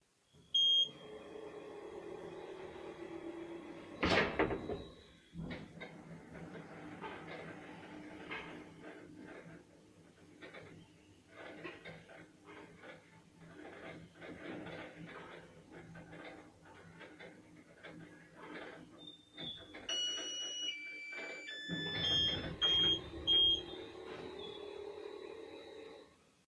Elevator record20151219023732
calling the elevator. then background noise of it moving.
background-noise, elevator, opening